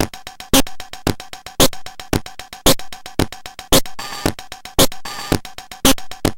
Mangled drum loop from a circuit bent kid's keyboard. Snares are majorly glitched.
bent, circuit, circuitbent, drumloop, glitch